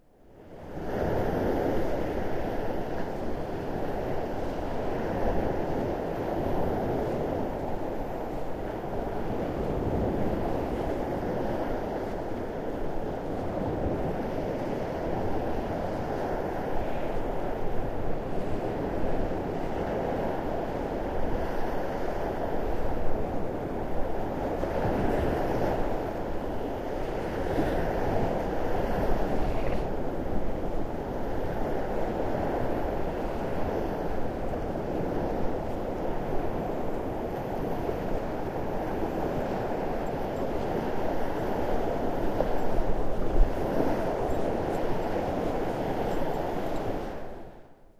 The North Sea 1
Walking along the shore line of the North Sea near Callantsoog (nl). An Edirol R-09 in the breast pocket of my jacket recording the sound of the breakers reaching the beach.